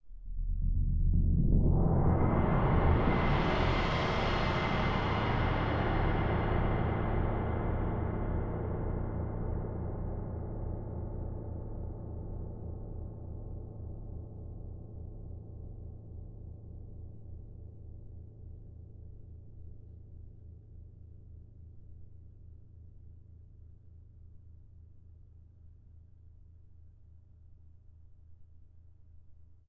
Thunder Sheet - Soft Mallet Corner Roll 1
Roll on the thunder sheet producing a sound similar to a cymbal roll
gong, cymbal, thunder, percussion